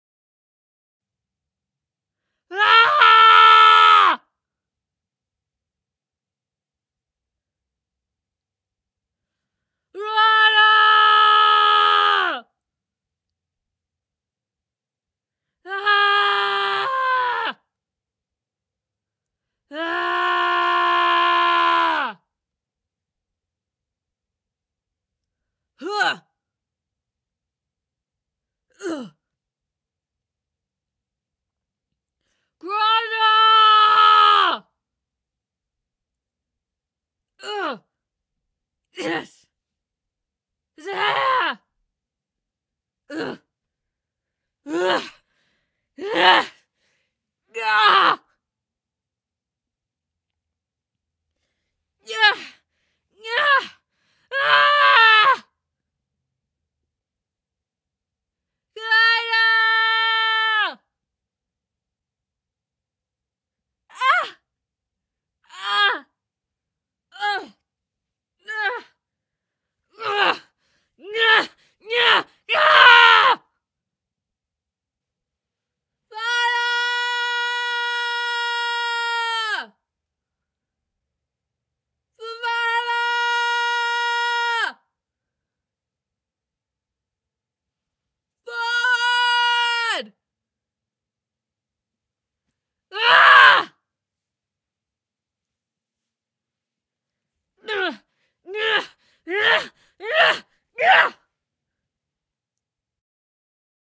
Screams of a female warrior on the battle field. Encouraging her companions forward, taking blows, giving blows and hacking something to pieces. #adpp
Recorded using a RODE NT-1 Microphone through a UK=r22-MKII interface using REAPER.
I recorded this within a blanket fort to reduce external noise and potential echo.